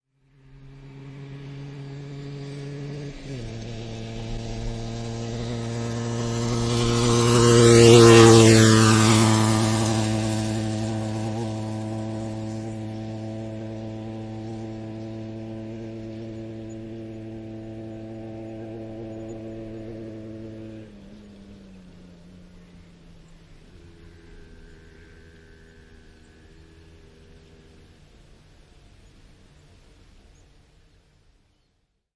Moottoripyörä, ohi asfaltilla / A motorbike, passing by on asphalt quickly, Yamaha 125 cm3
Yamaha 125 cm3. Lähestyy, ajaa ohi vauhdikkaasti, etääntyy.
Paikka/Place: Suomi / Finland / Nummela
Aika/Date: 05.10.1975
Finland, Finnish-Broadcasting-Company, Soundfx, Motorbikes, Suomi, Yle, Field-Recording, Tehosteet, Motorcycling, Yleisradio